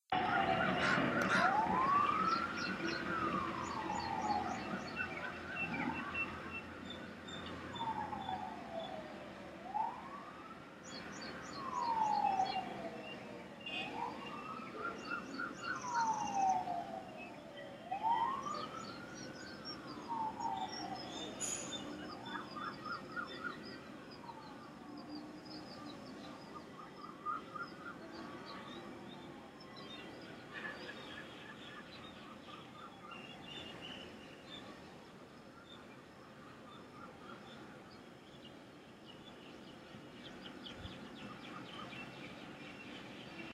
cbe16july2012sirens
overcast evening from my terrace in coimbatore - overlooking some farmland, with traffic from avinashi road and sounds of the airport in the distance
birds, coimbatore